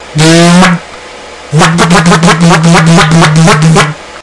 wob wob
reeps one, a great beatboxer, does this a lot but im not too great at it
dare-19, wob, beatbox